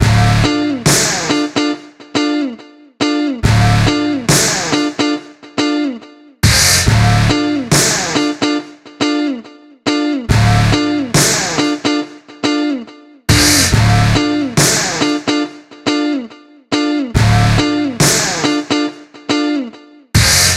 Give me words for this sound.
Reggae Loop
Plucky, Guitar, Reggae, Dubstep, Loop